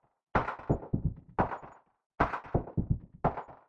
br-130bpm-A-Rim
this is a white noise - and maybe a rimshot from the novation drumstation909 soundbank (i dont remember) - filtered through a moog filter with some extra processing.
recordings is done with ableton live sequencer software digital processing of white noise.
moog-filter, lopass, rim-shot, noise